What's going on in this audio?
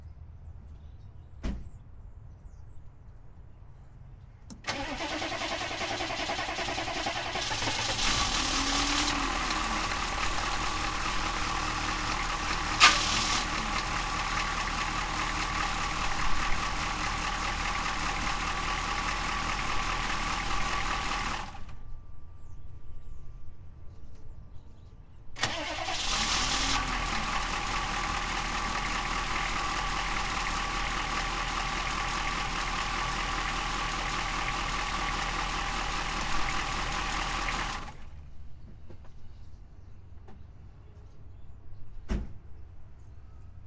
My 1983 GMC diesel suburban being started twice - once without waiting for the glow plugs to warm, and again after the vehicle is warmed up. Also turning the ignition after the car is running (not good for it, I know!) Recorded with a Neumann TL103 through a MOTU 828MkII. Hood was opened and mic was placed inside.